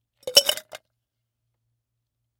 Ice Into Martini Shaker FF295
Dropping ice into martini shaker, ice hitting metal